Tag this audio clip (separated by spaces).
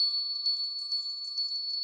bells delay loop remix